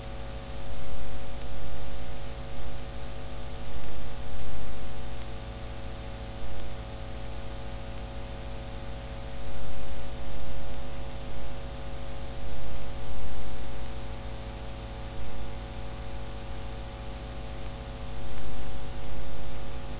Brus RolandMC202
This is how a Roland MC-202 sounds if you don't play it.
noise, mc-202, roland